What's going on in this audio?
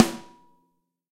BRZ SNARE 003 - WOH
This sample pack contains real snare drum samples, each of which has two versions. The NOH ("No Overheads") mono version is just the close mics with processing and sometimes plugins. The WOH ("With Overheads") versions add the overhead mics of the kit to this.
These samples were recorded in the studio by five different drummers using several different snare drums in three different tracking rooms. The close mics are mostly a combination of Josephson e22S and Shure SM57 although Sennheiser MD421s, Beyer Dynamic M201s and Audio Technica ATM-250s were also used. Preamps were mainly NPNG and API although Neve, Amek and Millennia Media were also used. Compression was mostly Symetrix 501 and ART Levelar although Drawmer and Focusrite were also used. The overhead mics were mostly Lawson FET47s although Neumann TLM103s, AKG C414s and a C426B were also used.
close, space, live, room, stereo, snare, real, overheads, drum